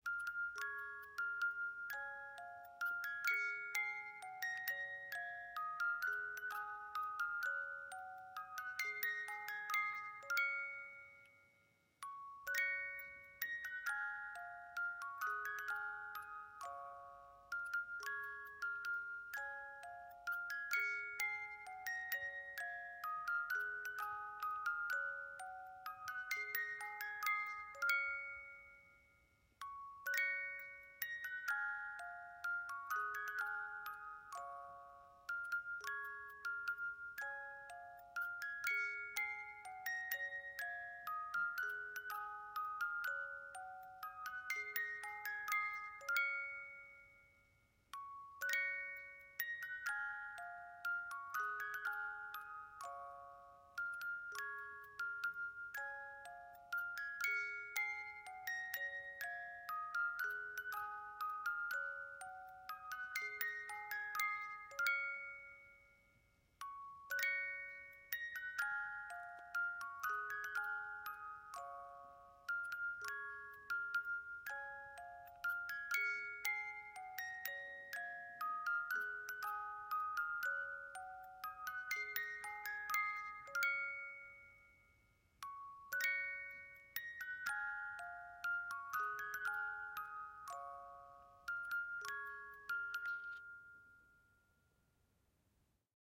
gentle music box
a gentle sounding music box inside a teddy-bear.
KM201-> ULN-2.
baby, box, child, children-room, music, musical-box, music-box, musicbox, sleep, sleeping, sweet, teddy-bear, toy, wind-up